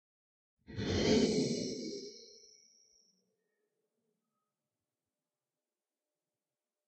Something big snorting. Made for a werewolf audio drama.